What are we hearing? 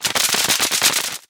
Mechanical, transformation, fast, Clicks, mechanism, press, gear # 1
transformation, Machinery, Mechanical, Machine